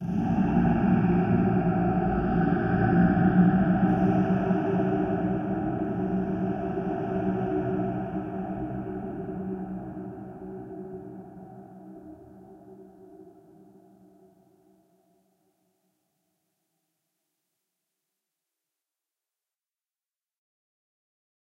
brain claim growl dre fx
re-edit of this::
made a copy, stretched it, only used the end of it (fades), mixed em, gave it a strange eq, and reverb. all done in adobe audition.
ambient, thrill, haunted